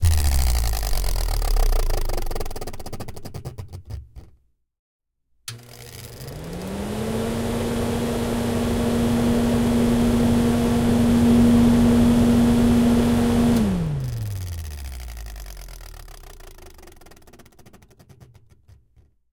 Vent fan
Miked at 3-10" distance.
Stove overhead fan recorded powering down, followed by subsequent power-up/power-down [mic was moved closer/further from fan, according to fan speed, in order to minimize air movement against diaphragm].
buzzing, blowing